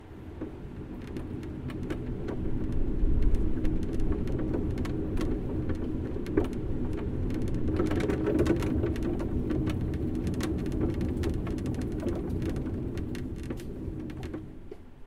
Cart RBR 3
A wooden book cart rolls on a wooden library room floor.
cart, field-recording, library, roll, wood